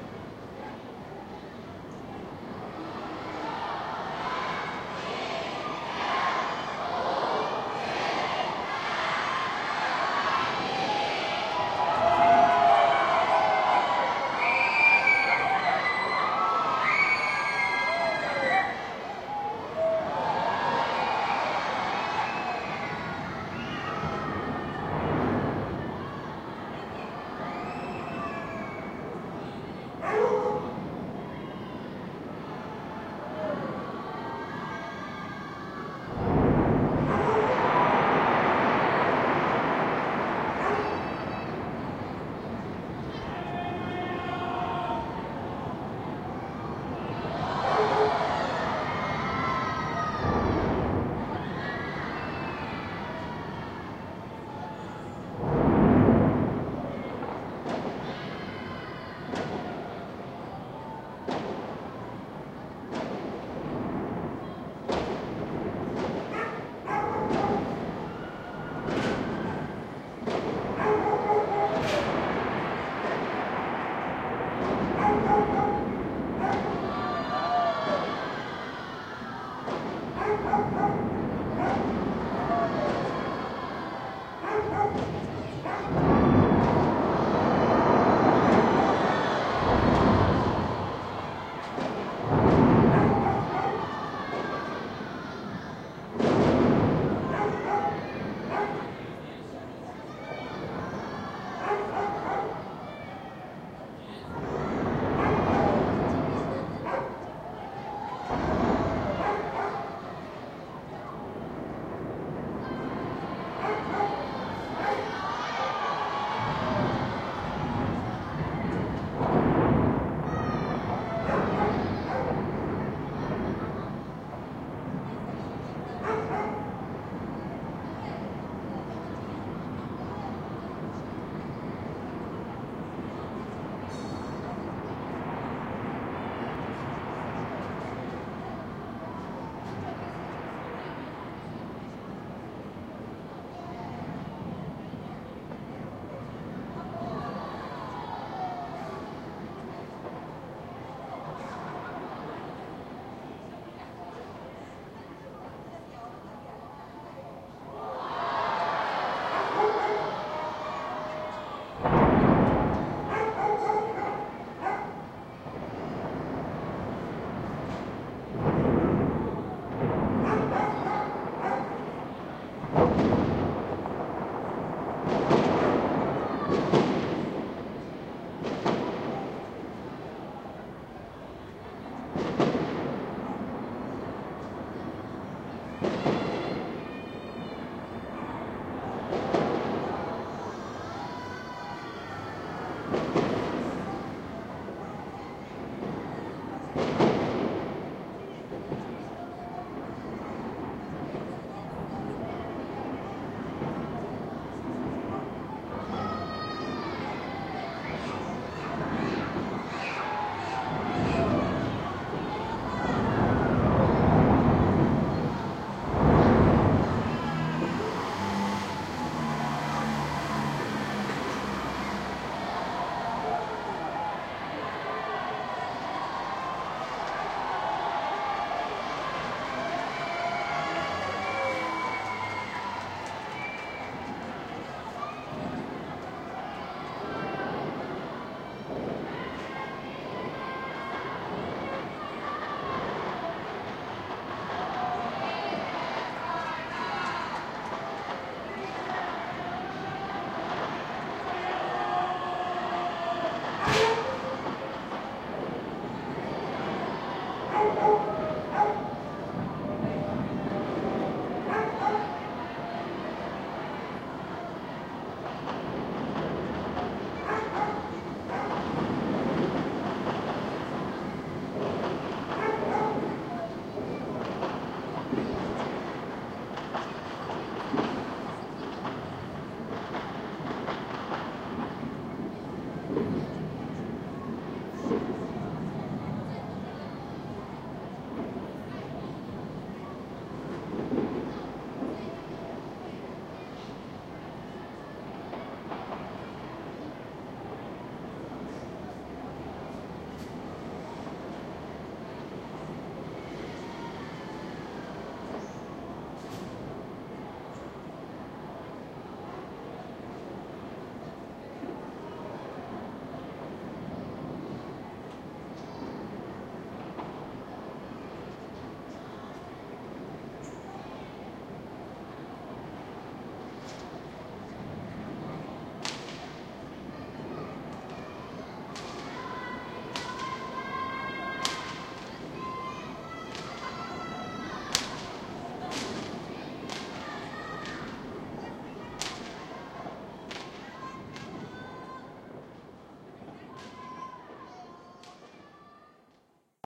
NEW YEAR 2012
January 1, 2012. Recorded with ZOOM H2 on my apartment roof during the New Year's fireworks display at Taipei 101 building. Explosions, crowds cheering, a dog barking, a scooter making a getaway.